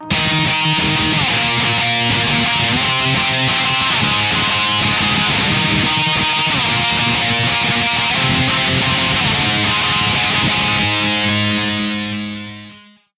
a nu rock sounding lead recorded with audacity, a jackson dinky tuned in drop C, and a Line 6 Pod UX1.
break-down, breakdown, death-metal, death-metal-riff, deathmetal, finger-tap, finger-tapping, guitar, guitar-riff, guitar-tapping, metal, metal-riff, metal-trill, nu-rock, nu-rock-riff, punk, punk-riff, riff, trill